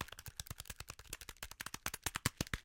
Bashing buttons on a calculator.